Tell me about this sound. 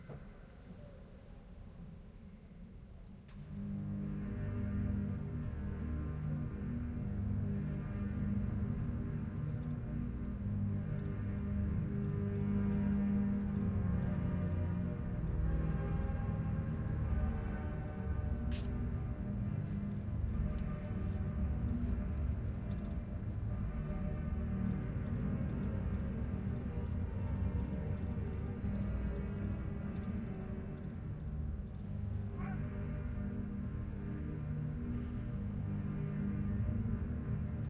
church organ 1
Organ starting to play during meditation hour in the Cologne cathedral. OKM Binaurals, Marantz PMD 671.
echo, hall, organ